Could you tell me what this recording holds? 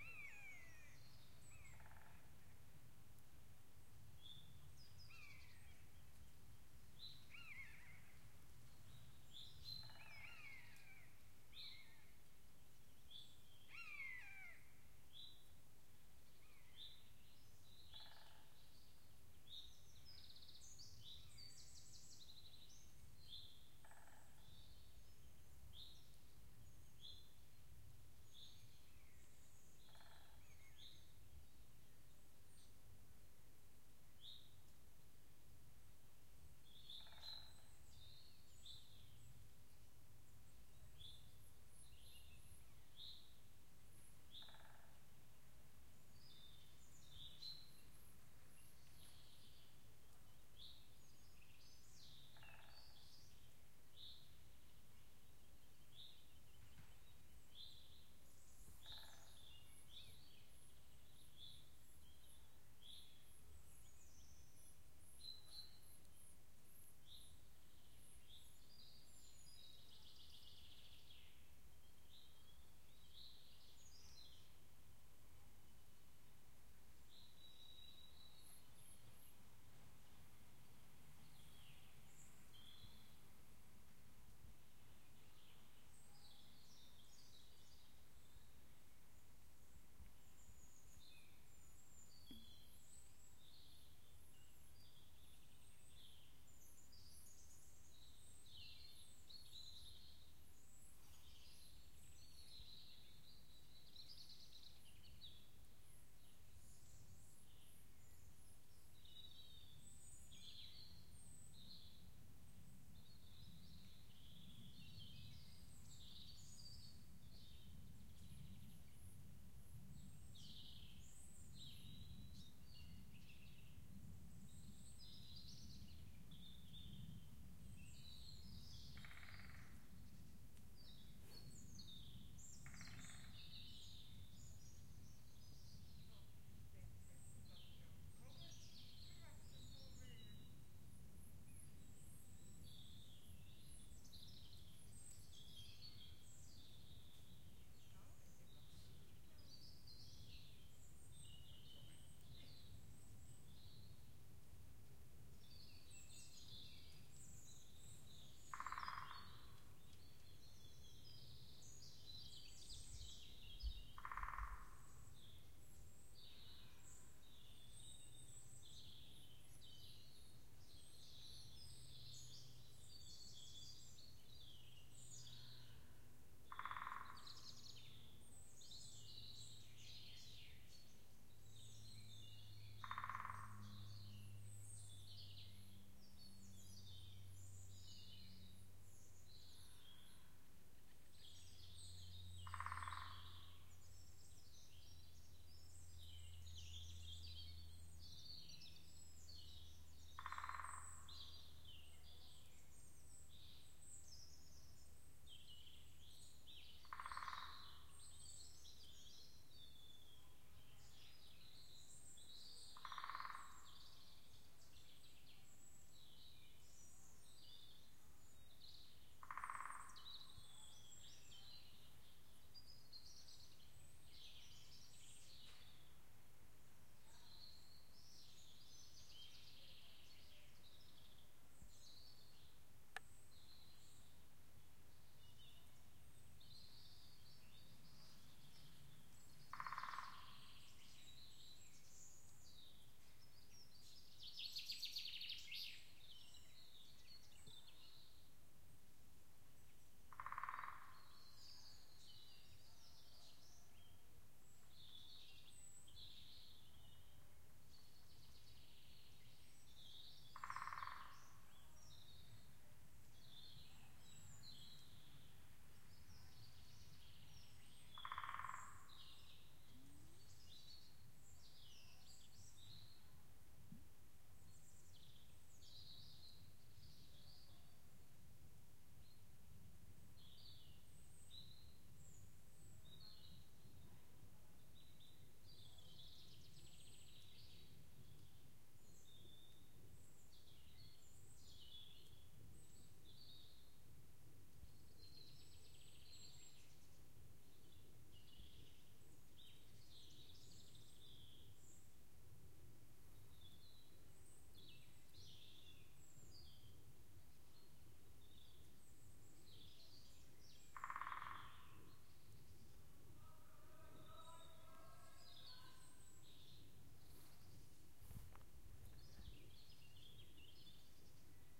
Birds forest woodpecker
forest ambience recording
field-recording, ambient, nature, forest, woodpecker, birds